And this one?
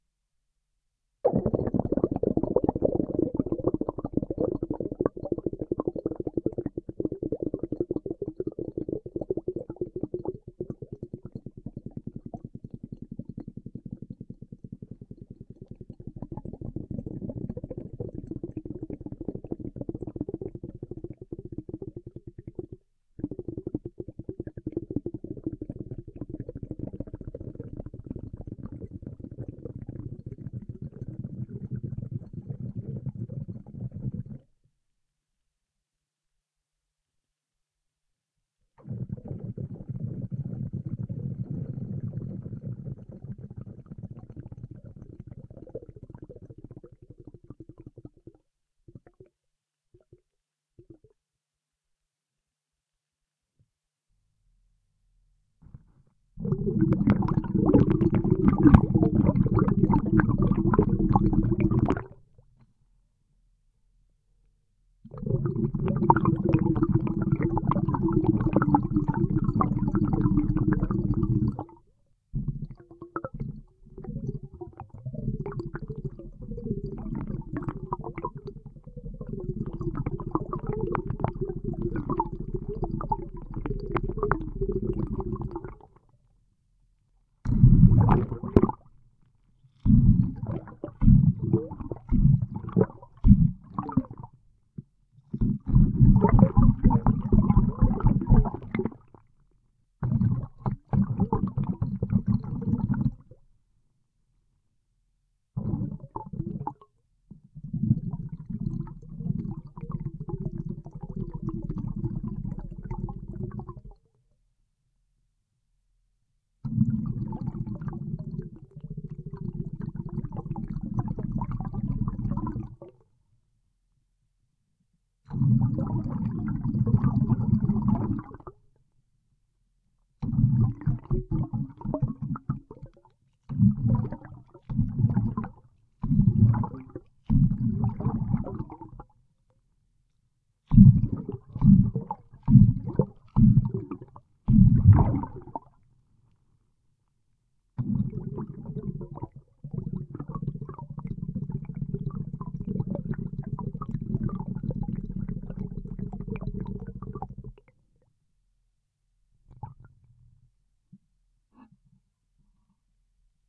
This is an under water recording of some bubbles. I filled up a laundry bin with water, stuck a Sennheisser MD21 (dynamic omnimic) wrapped in a plastic bag in there (under the water level). I pumped bubbles from the bottom of the bin through a bicycle pump. Gear used: MD21 -> M-Audio DMP3 -> Terratec EWX2496. Submission for the EarthFireWindWater contest.